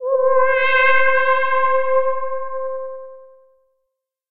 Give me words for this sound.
Medium high pitched "alien moan," FM synth with sweeping vocal formant filtering, vibrato at end. (MIDI 72).